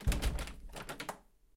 Door Locked 01
Office door. Recorded with Zoom H4n.
Door, field-recording, handle, locked